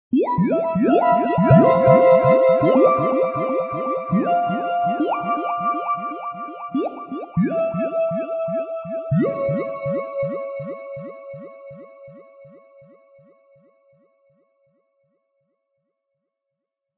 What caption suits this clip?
Ode To John Carradine
Thought it might work as a drop-in for others.
ambient, electronic, loop, sci-fi, soundscape, synth